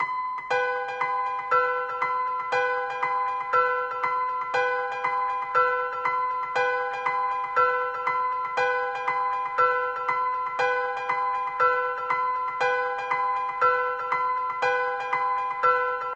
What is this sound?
simple piano electronic sample